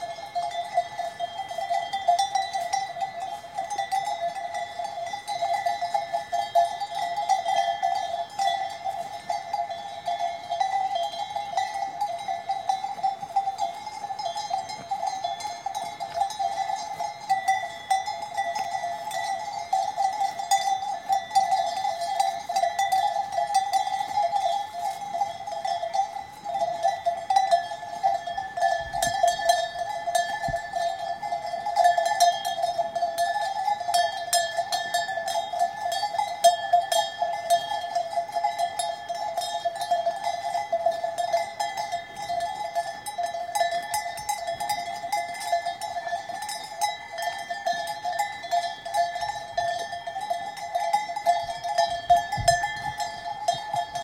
AMB - cowbells Mono
Recording of cowbells @ galician mountains,
Sennheiser 416 @ zoom h4n.
cow, nature, galicia, Ambience